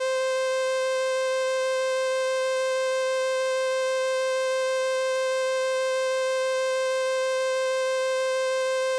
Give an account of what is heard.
Transistor Organ Violin - C5
Sample of an old combo organ set to its "Violin" setting.
Recorded with a DI-Box and a RME Babyface using Cubase.
Have fun!